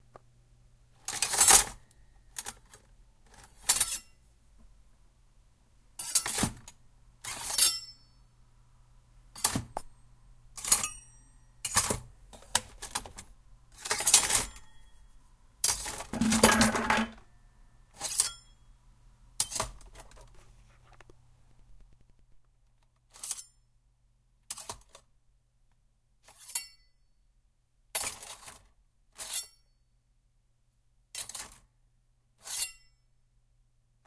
big kitchen knife
the sound of a knife. a kitchen knife. a big one.
slash, killer, knife, kitchen